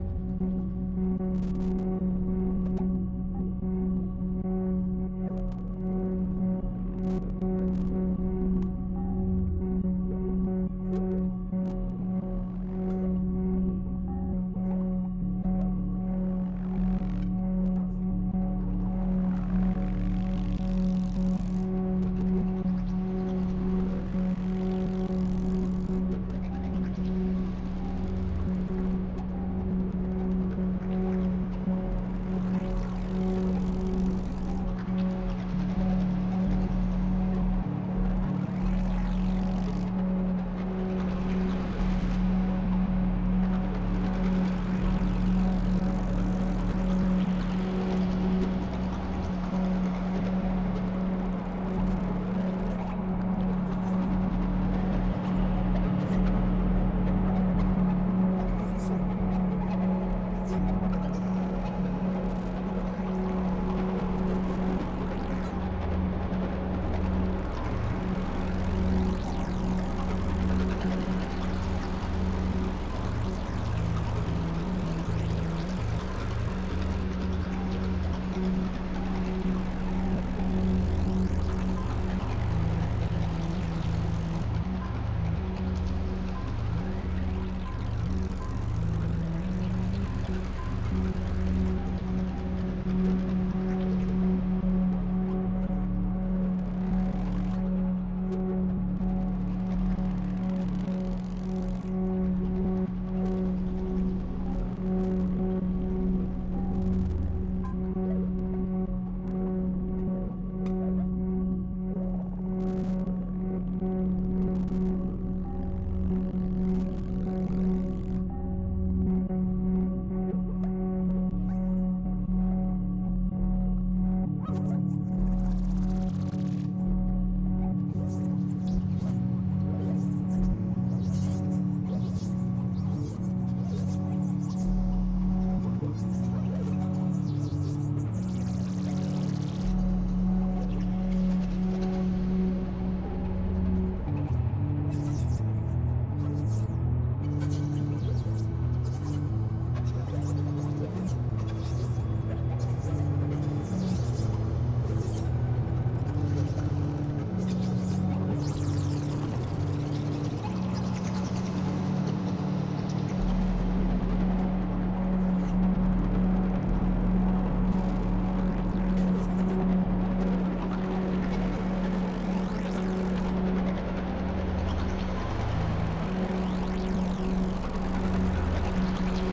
My first excursion into the ambient realm. My friends mimeophon, O-Ctrl, morphagene, X-Pan, Erbe-Verbe and the omnipresent Clouds took me to somewhere I never thought I'd venture. Basically a very simple sound source, sped up and slowed down in segments, granulated and pasted back together. Quite a wet mix. Shake the drops off afterwards...